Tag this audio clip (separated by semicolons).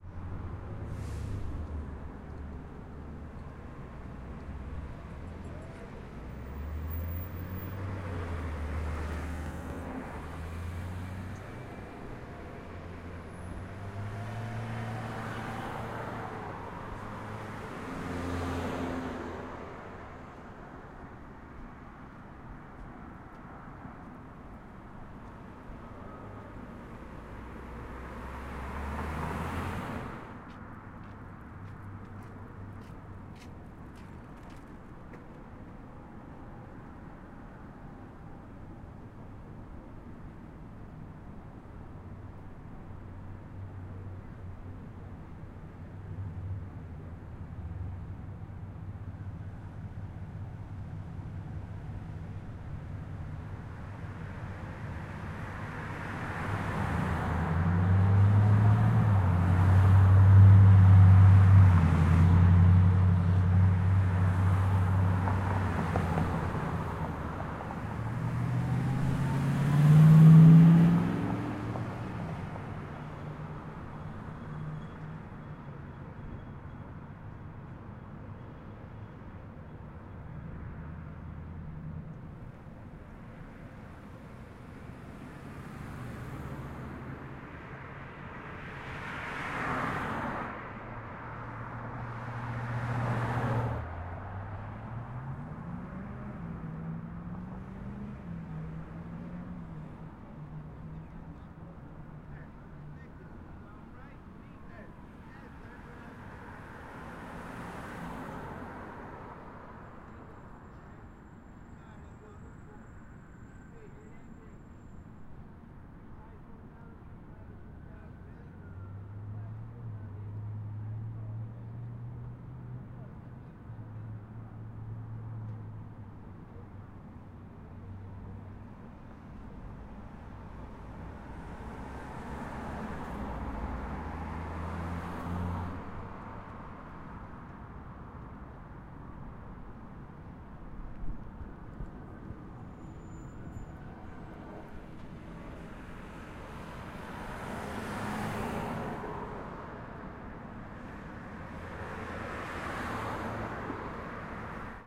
AudioDramaHub; City; field-recording; Los-Angeles; Street; traffic